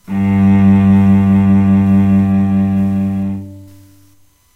8 cello G2 fingeronCstring
A real cello playing the note, G2 (2nd octave on a keyboard) by placing the finger in fourth position on the C string (instead of playing the open string). Eighth note in a C chromatic scale. All notes in the scale are available in this pack. Notes, played by a real cello, can be used in editing software to make your own music.